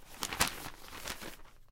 newspaper close
newspaper closing, Neumann U-87, ProTools HD
newspaper, turn, pages